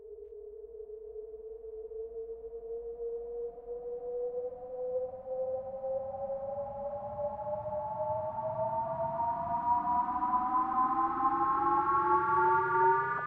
drum and bass FX atmosphere dnb 170 BPM key C